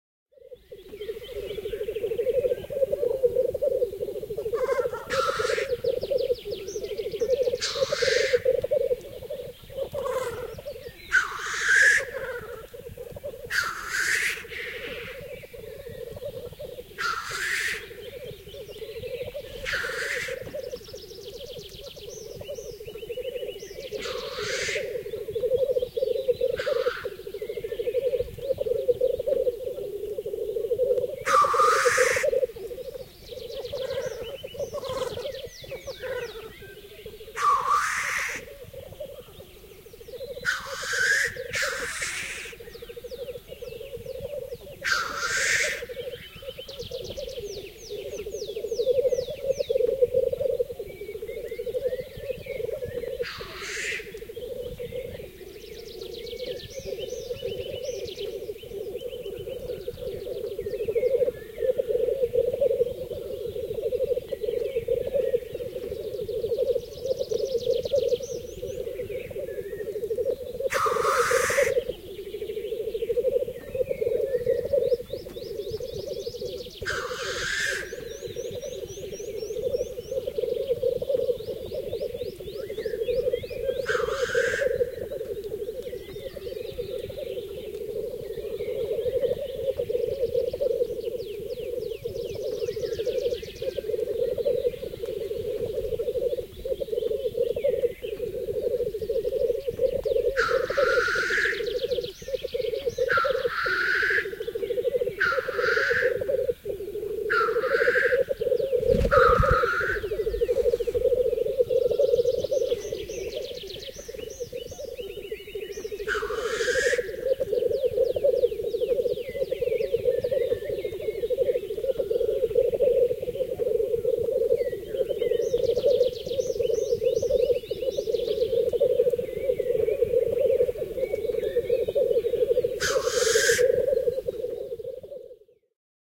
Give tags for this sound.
Bird
Luonto
Finnish-Broadcasting-Company
Nature
Tehosteet
Suomi
Finland
Soidin
Birds
Yle
Display
Soundfx

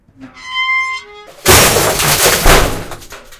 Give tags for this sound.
clatter
metal
collapse
break
creak
squeak
fall
crash
bang

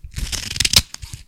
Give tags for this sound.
scissors
slicing
cutting
snip
paper

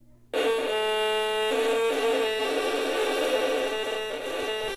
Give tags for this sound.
creak
creaking
creaky
creepy
door
door-creaking
eerie
hinge
hinges
squeak
squeaking
squeaky

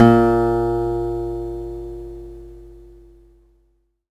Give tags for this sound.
acoustic
multisample

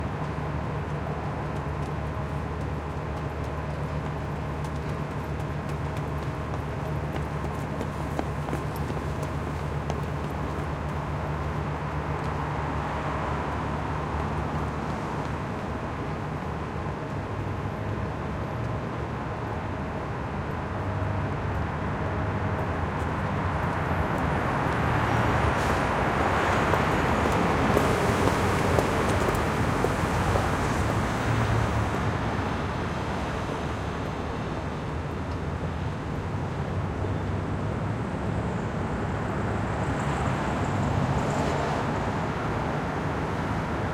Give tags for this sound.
Cars
ambient
walking
talking
Traffic
Public
field-recording
Passing
sound
people
Kentucky
Street
trucks
cityscape
design
congestion
City
atmosphere
footsteps
downtown
Transport
Bus
soundscape
Travel
Transportation
general-noise
Louisville
Road

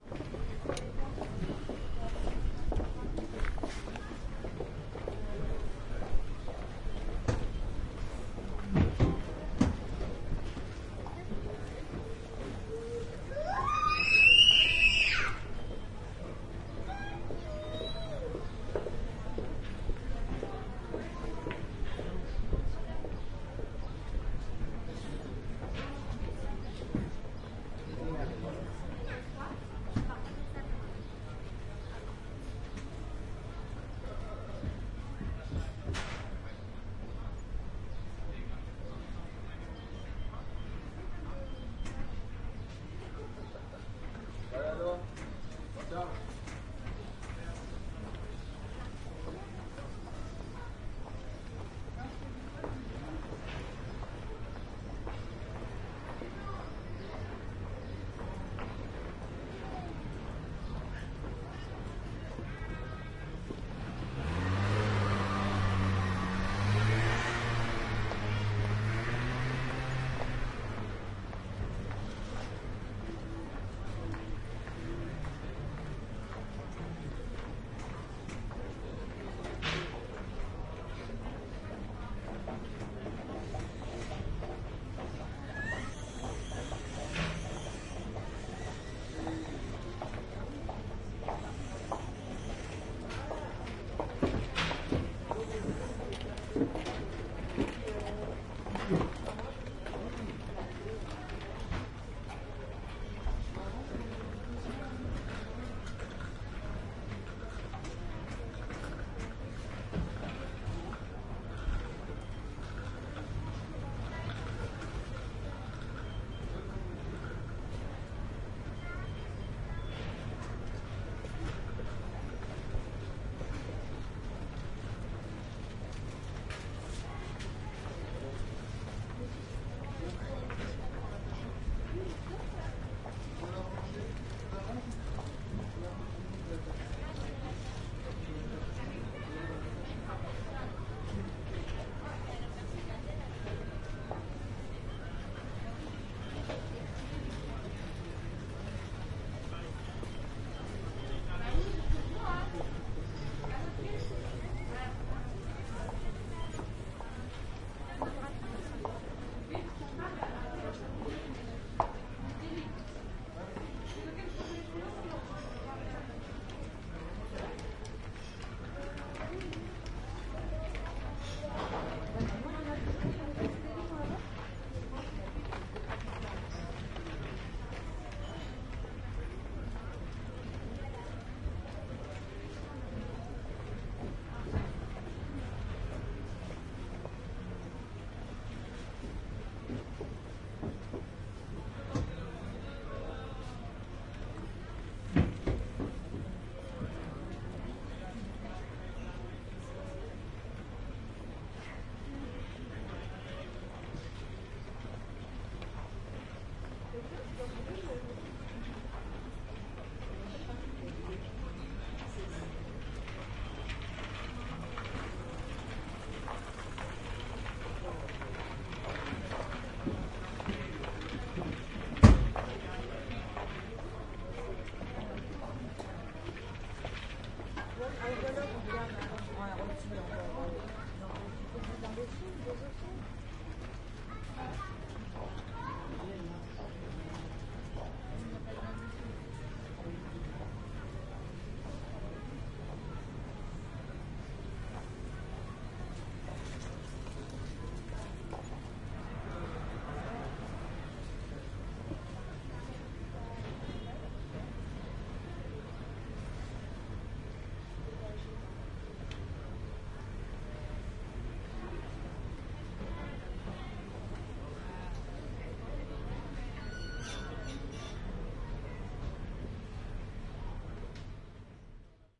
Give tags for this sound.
rue
mouffetard
france
walla
people
market
paris

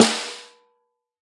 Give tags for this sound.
1-shot
drum
snare
multisample
velocity

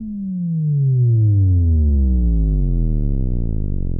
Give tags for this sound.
analog
sfx

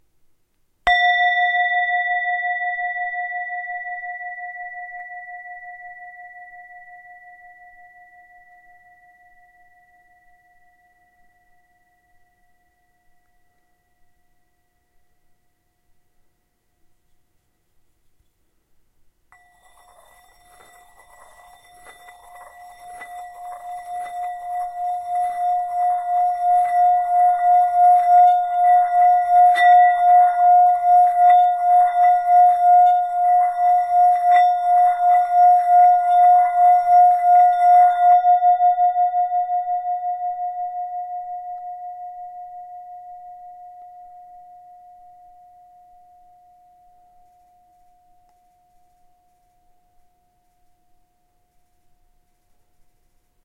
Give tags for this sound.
meditation
chime

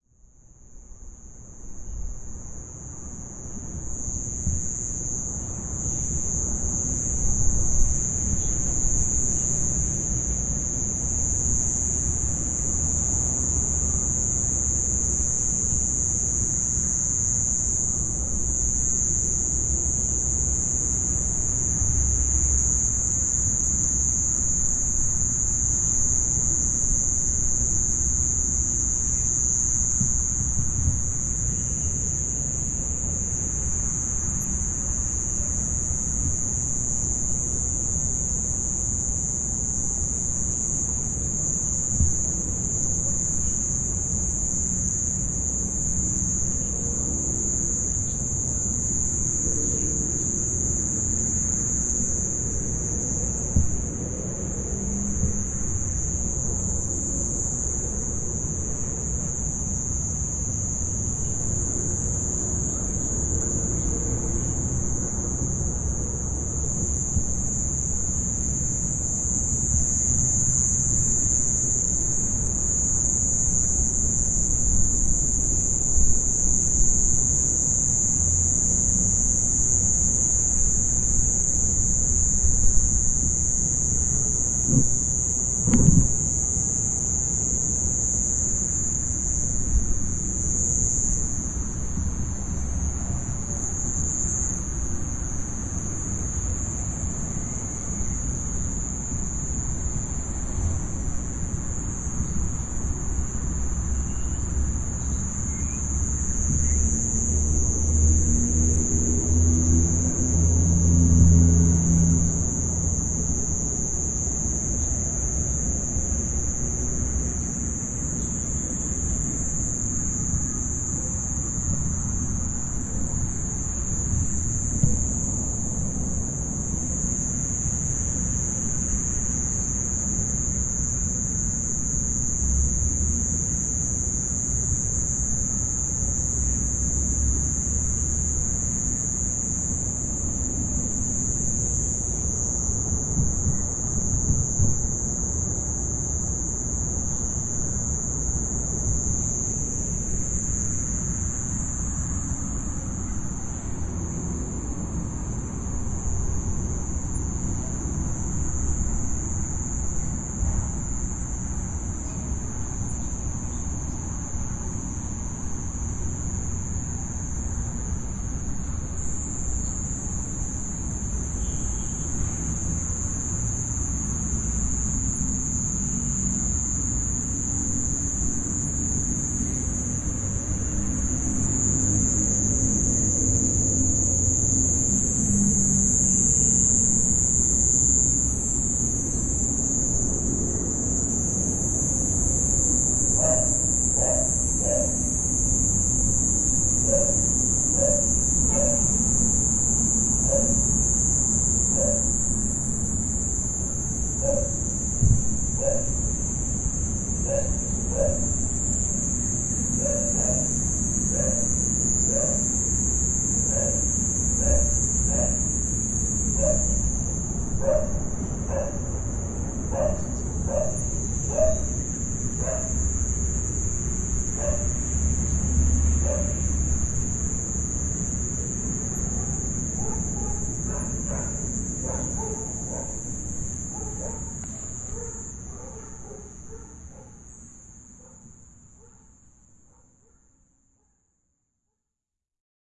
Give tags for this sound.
field-recording
sound
bark
outdoor
zoomh4
crickets
dog
nature
ambience
barking
background-noise

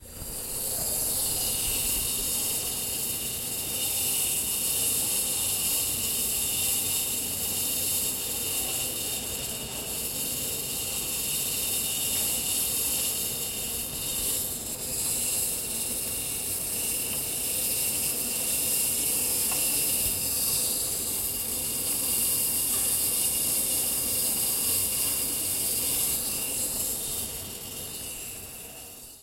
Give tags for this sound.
campus-upf
copy-machine
electric
malfunctioning
noise
UPF-CS14

